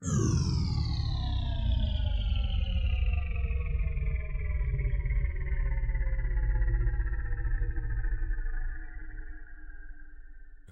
Spaceship Engine Landing
I am doing a growling sound using a preset called Powering Down.
To ME this sounds like a spaceship landing or is powering down.
To you this sound can be anything you all like.